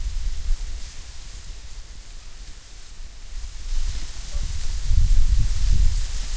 Reeds in the wind, beside a path along a disused railway line. Winter, the land is flat and the vista stretches for miles. Zoom H1 using on-board mics.